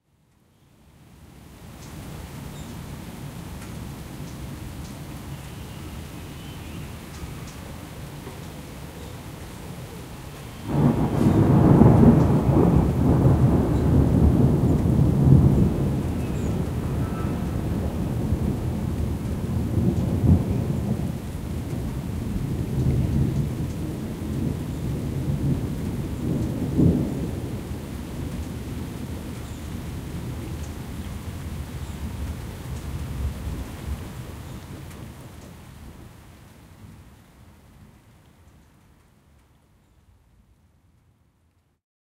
distant thunder & light rain 1
Summer afternoon with light rain and distant rumbling thunder. Some birds chirping.
Germany near Frankfurt @ the open window of my room - with view to a garden area with many trees.
The rain can be heard on the close-by metal rain gutter.
some low background noise of cars.
Recorded with an Zoom H4n mics on 90°
distant-thunder, light-rain, Zoom-H4n, field-recording, 90degree